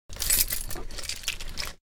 keys jingle
keys, jingle